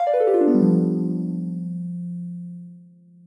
harp-motif3
A short harp motif that can be used for notification sounds in your app.